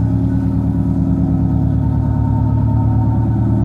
ghost yacht
spooky loop from the unprocessed sound of a yacht engine at the port of Genova